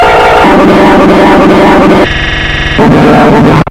This is a Casio SK-1 I did around a year ago or so From Reeds book plus a video out and 18 on board RCA jacks with another 25 PIN DPI that can run through a breakbox. Noise and Bent Sounds as Usual. Crashes ALOT. Oh and it's not the hardest "mother of bends" Serious, I wore socks and everything.